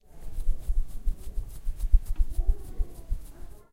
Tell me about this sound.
mySound MES Cristina
mySound, Spain